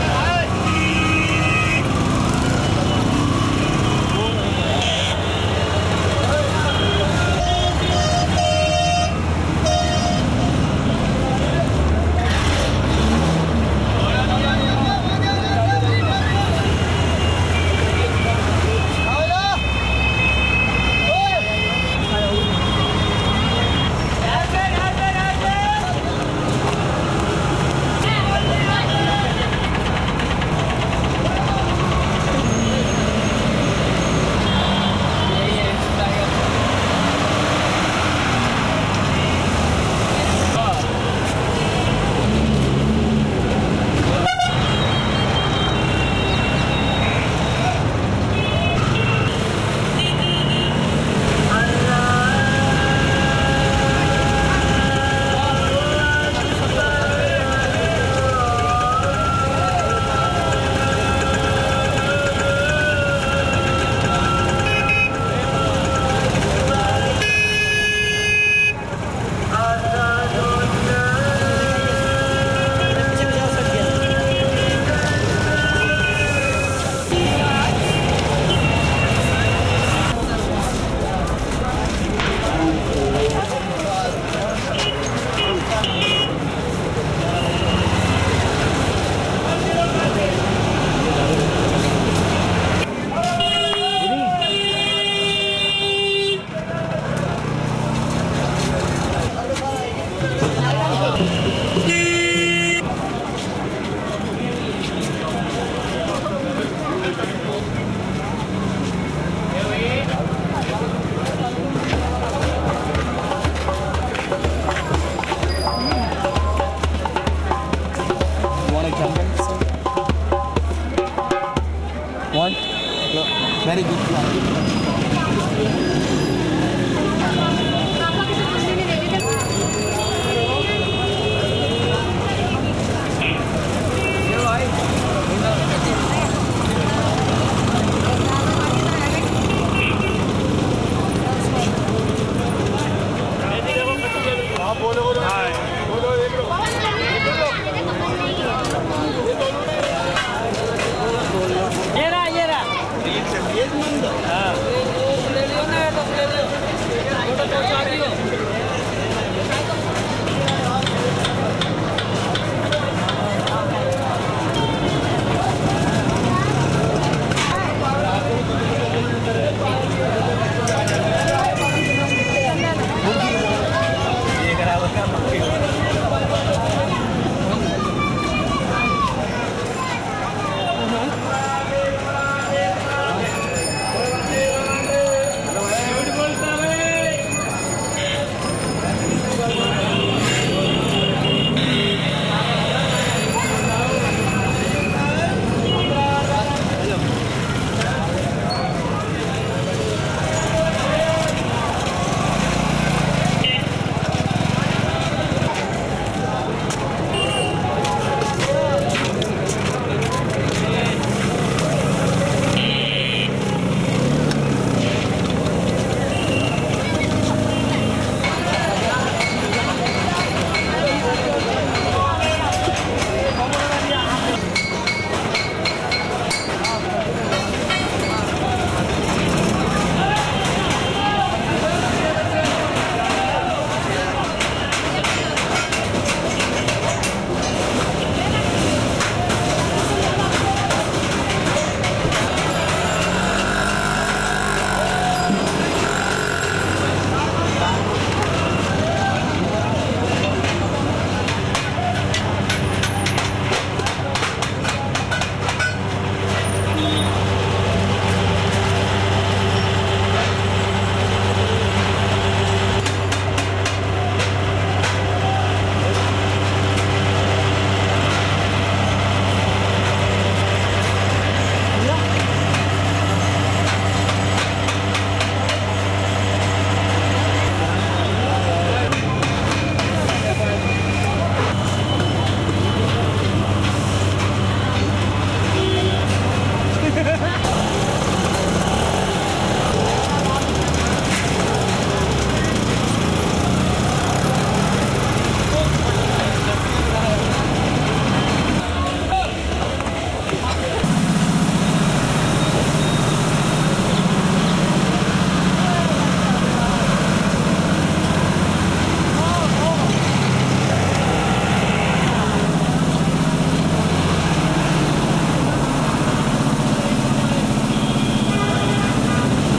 India Streets NewDelhi City (Traffic, Tuktuks, Honking, Hawkers, Muezzin, Construction Works)
India, Streets Of New Delhi. You hear the usual traffic jam with honking cars, buses, tuktuks, lots of voices, hawkers offering their products to pedestrians and the usual chaos.
Hawkers, People, City, Public, Engine, Pedestrians, Passing, Muezzin, Transport, Road, indistinguishable-voices, Construction-Works, Traffic, Travel, Honking, NewDelhi, Transportation, India, Street, outdoor, Streets, Cars